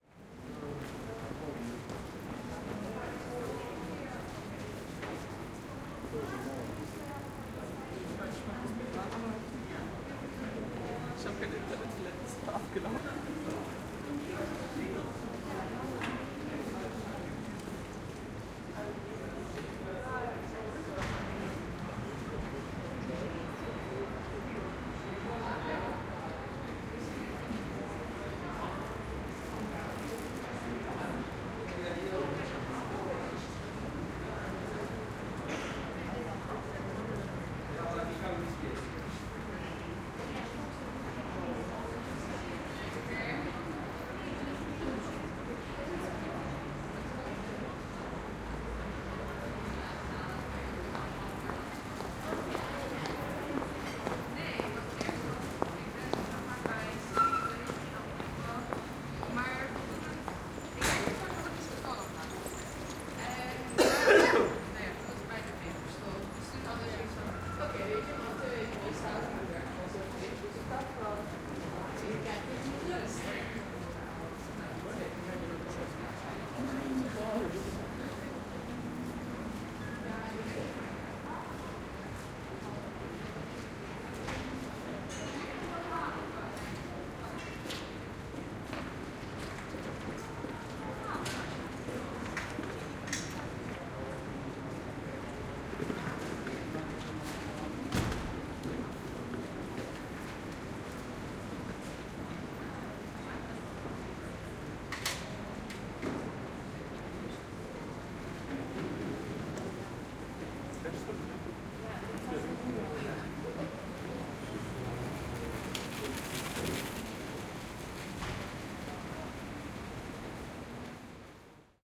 Cologne City Center Ambience 2 (Surround)
Short Ambience of Cologne's City Center, in a pedestrian area. People passing by, talking. Some bicycles pass as well.
Recorded with a spaced array of 2 KM184 (front) and 2 KM185 (surround) into a Zoom H6.
Menschen, Atmo, Deutsch, Innenstadt, Fu, Martinshorn, People, City-Centre, People-Talking, Atmosphere, Pedestrian-Area, Passanten, Bicycles, Field-Recording, Walking, German, Fahrr, ngerzone, Gespr, der, g, ln, Ambience